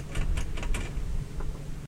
car changing gears